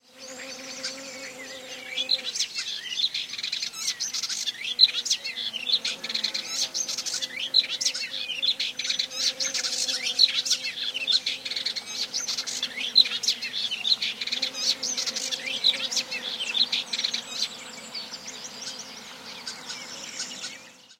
European Serin (Serinus serinus) song, a buzzing bee is also heard. Primo EM172 capsules inside widscreens, FEL Microphone Amplifier BMA2, PCM-M10 recorder